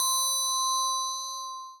fm7bell
Synthesizer drumkit produced in Native Instruments FM7 software.